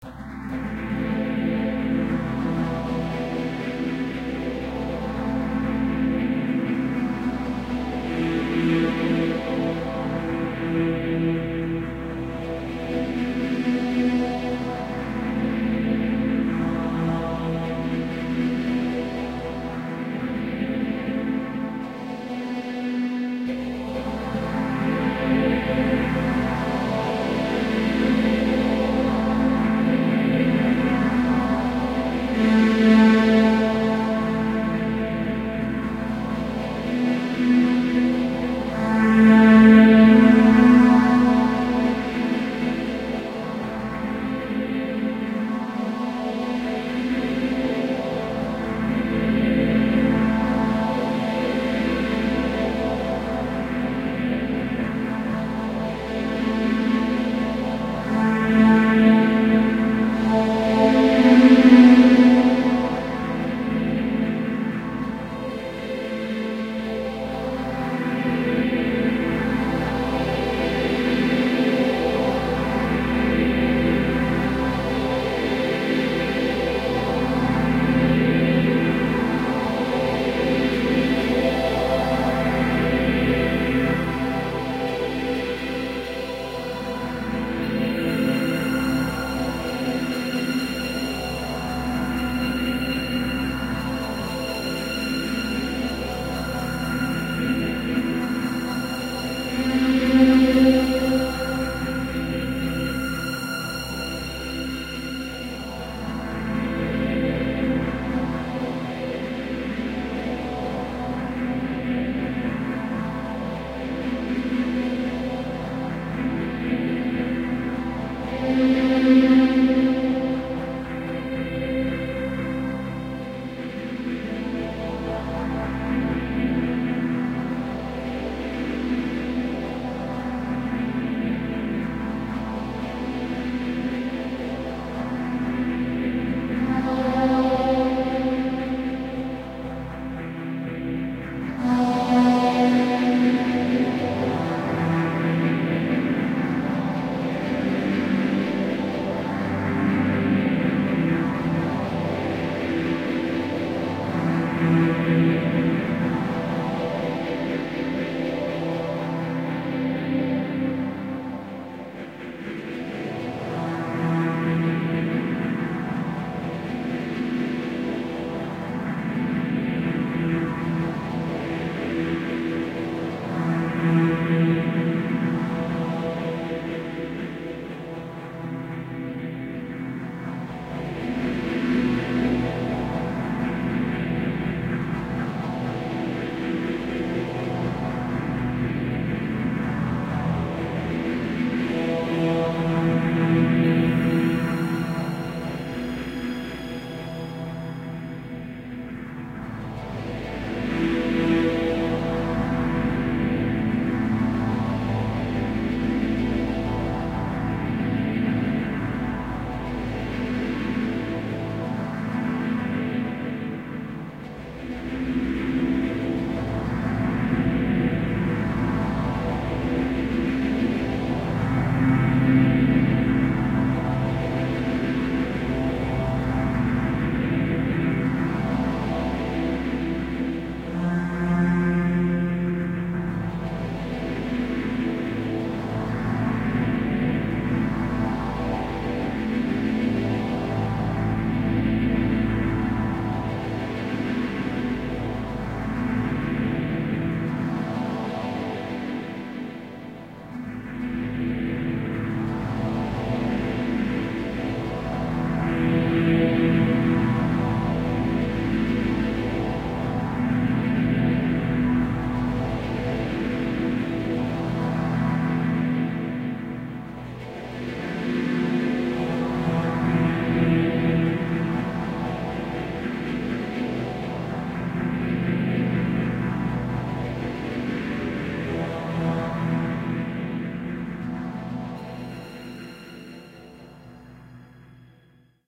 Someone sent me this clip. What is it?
Smooth Strings
Strings and synth, a smooth, bright, full sound, good for background or use as a loop in your composition. This was actually made from the same midi's that I used for Drum Rhythms, recorded at my keyboard and processed through the virtual synth in the daw.
dreamy, background, enigmatic, improvised, uplifting, airy, orchestral, futuristic, movie, vibrant, pad, experimental, instrumental, atmospheric, atmosphere, spacey, synth, ethereal, light, bright, strings, synthesizer, music, ambience, cinematic, warm, film